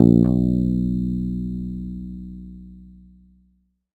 First octave note.
bass, electric, guitar, multisample